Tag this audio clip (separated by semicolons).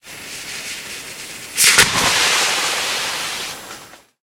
blast; cannon; fizzing; fuse